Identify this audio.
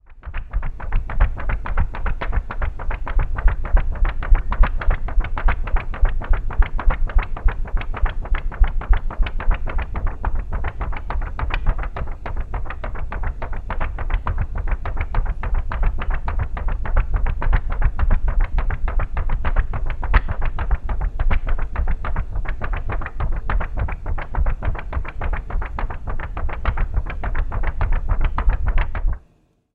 Shaking of plastic sheet film. Recorded using mono microphone and ensemble. No post processing